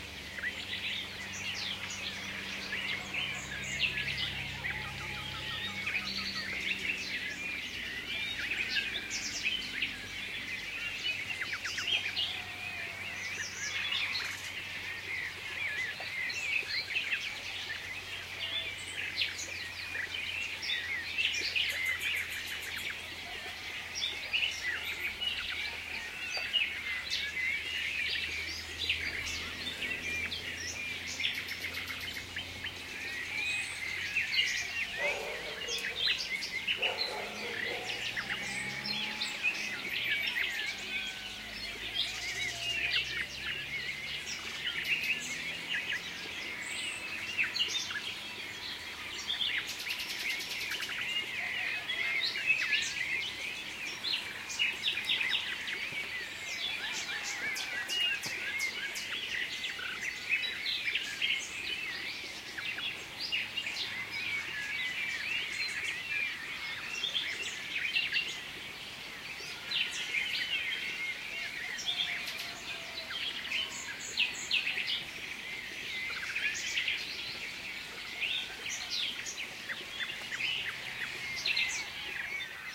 20090501.dawn.chorus.02
birds singing at dawn (6 am) near Carcabuey, S Spain. Blackbird, house sparrow, starling and nightingale among others. Some dog barkings and distant traffic. Sennheiser MKH60 + MKH30 into Shure FP24 preamp, Edirol R09 recorder. Decoded to mid-side stereo with free Voxengo VST plugin
ambiance, field-recording, birds, nature, spring, andalusia